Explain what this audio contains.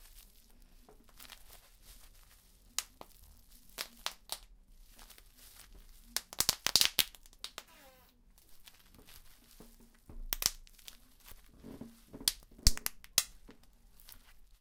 The typical sound of squeezing bubblewrap to pop the bubbles.
My 5 year old daughter Joana helped! Kids love to pop bubblewrap!
Popping and cracking! There is some hand noise and some floorboard creaking noise in there too, unfortunately.